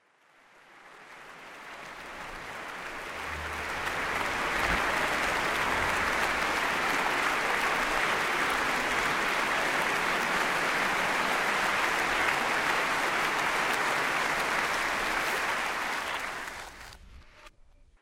A big applause sound from a concert at the Barbican. But backwards.